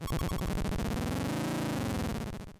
8bit Retro Vehicle Stop Starting 01
Useful effect for retro games or animations.
Thank you for the effort.